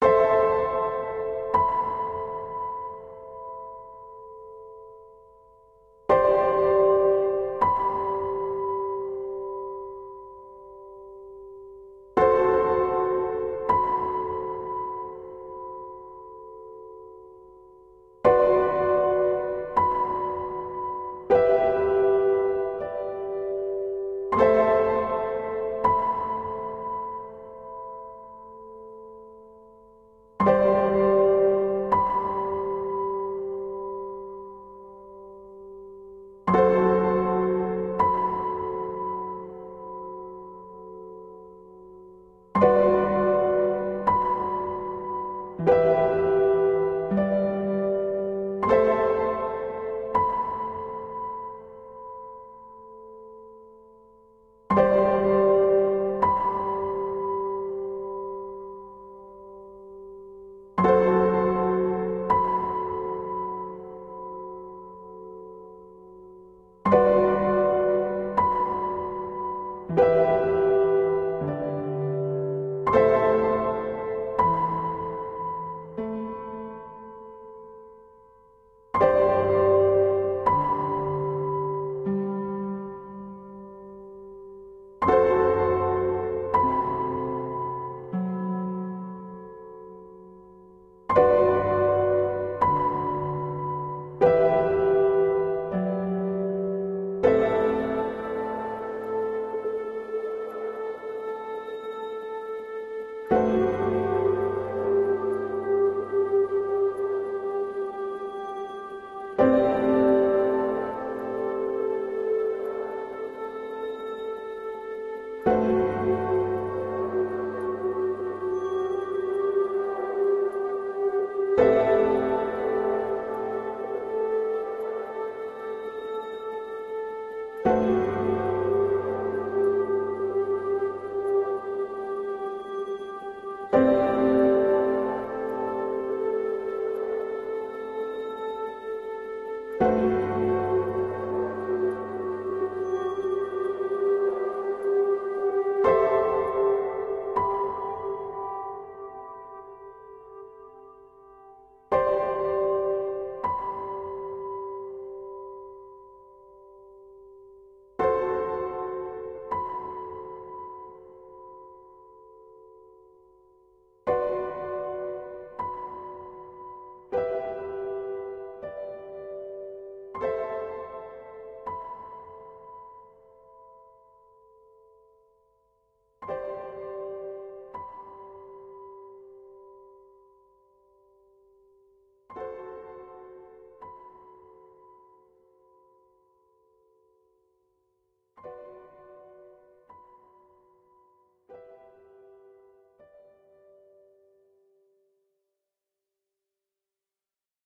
Title: Dispair
Genre: Piano, Emotional, Despair
I tried to make despair music and do minimalistic things, I'm using FL Studio with free VST & Sample Packs